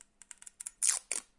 Large Monster Energy Drink Tape Pealing Away From Top Lip
This is a Large Monster Energy drink can's plastic tape being pealed off of the lid before you open it. This is good for cinema and audio drama and theater.
soda, Large, Monster, energy-drink